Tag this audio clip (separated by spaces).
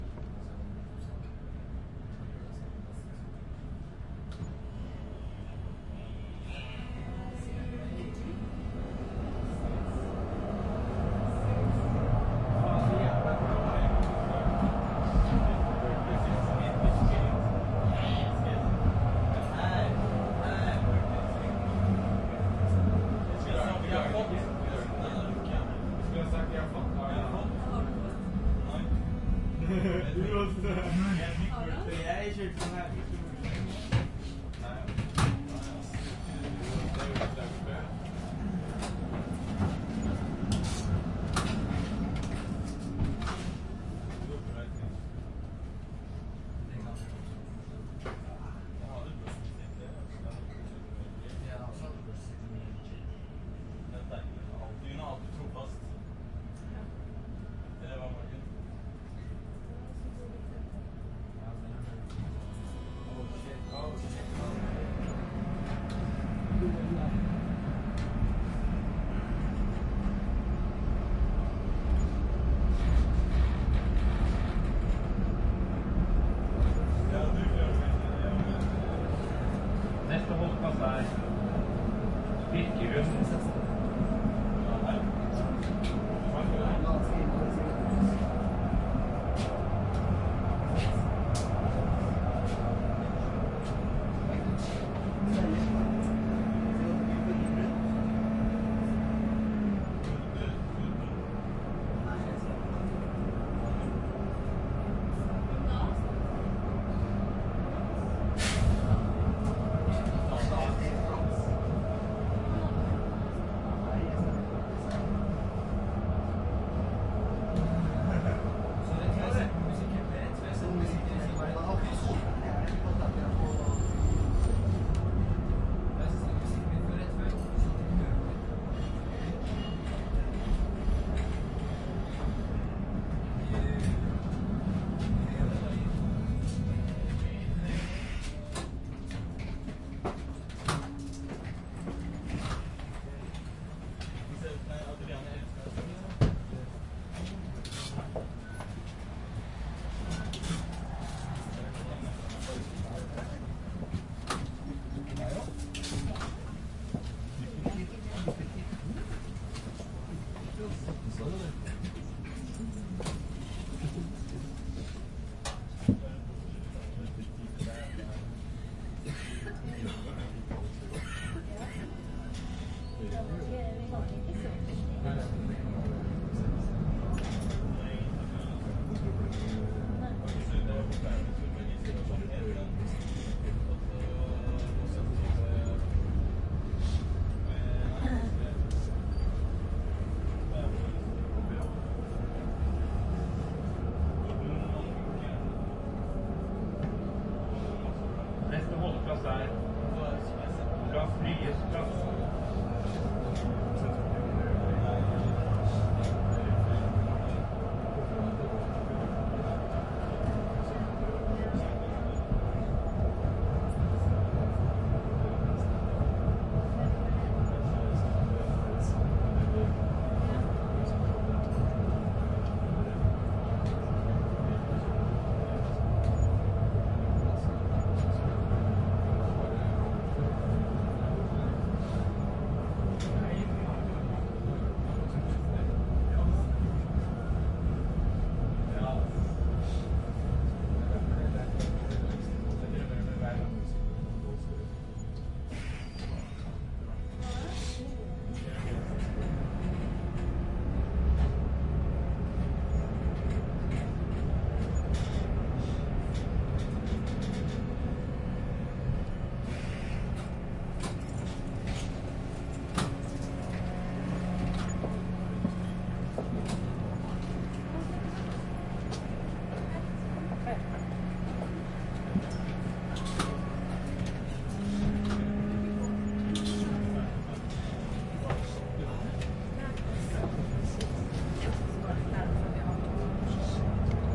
oslo gr tram norway street people nerl streetcar inside